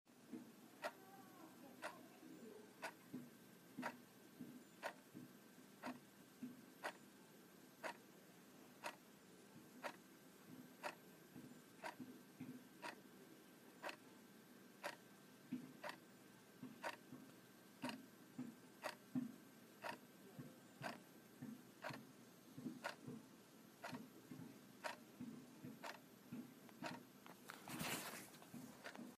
This is the sound of an analogue wall-clock's second hand ticking.
analog, analog-clock, analogue, analogue-clock, appliance, appliances, clock, clockwork, field-recording, hour-hand, second-hand, ticking, tick-tock, wall-clock